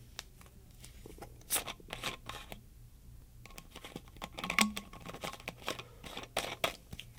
ABRINDO GARRAFA
It was an audio instrument that allowed to capture the sounds of a disc being pointed, inside an editing island.
Recorded for the subject of Capture and Audio Edition of the course Radio, TV and Internet, Universidade Anhembi Morumbi. Sao Paulo-SP. Brazil
Foi usado na gravação desse áudio um microfone condensador captando o som de uma garrafa de água com gás sendo aberta e depois fechada, dentro de uma ilha de edição.
Gravado para a disciplina de Captação e Edição de Áudio do curso Rádio, TV e Internet, Universidade Anhembi Morumbi. São Paulo-SP. Brasil.